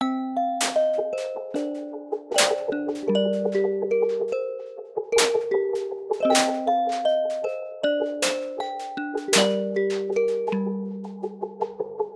Cminor ballad (80pbm)
ballad, loop, loopable, melody, piano, sample